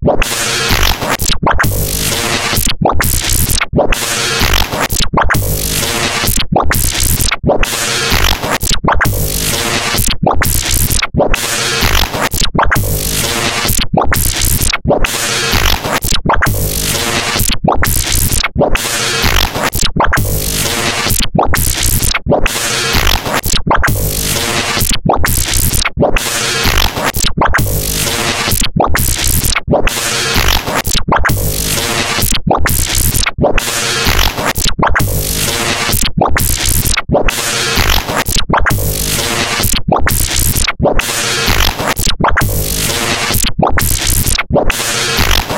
Custom programmed granular synthesis sampling instrument
bass, electro, electronic, experimental, glitch, granular, growl, processed, synth, wobble